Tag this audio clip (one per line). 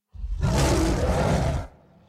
beast
growl
monster
snarl